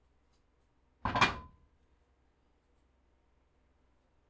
Setting a cast iron pan down on a stove top